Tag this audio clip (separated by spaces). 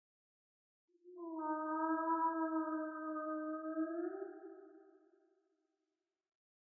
Big Underwater Animal